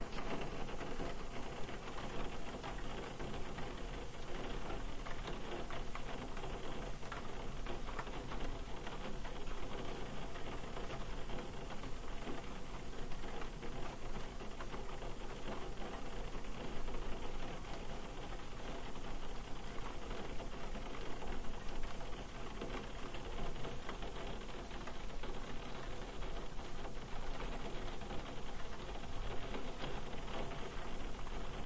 Rain on the roof window
Rainy weather hits the roof window
Recorder Tascam DR-05